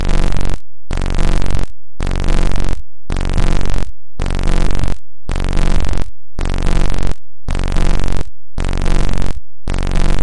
These clips are buzzing type audio noise.
Various rhythmic attributes are used to make them unique and original.
Square and Triangle filters were used to create all of the Buzz!
Get a BUZZ!